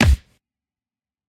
PUNCH-BOXING-03
06.22.16: A punch created from the sound of a leather glove being whipped, processed alongside a thickly-layered kick drum.